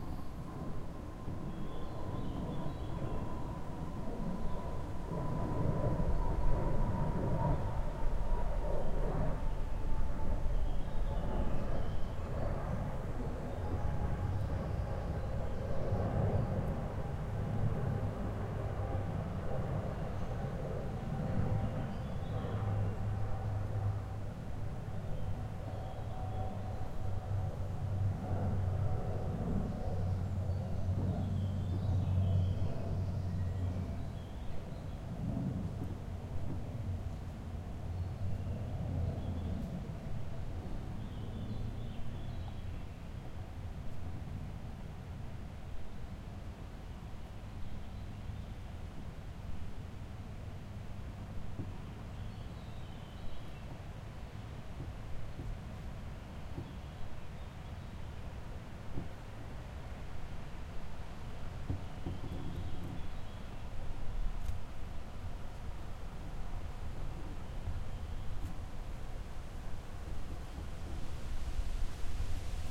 Background Noise, Jet, City, Birds
Background, Birds, City, Jet, Noise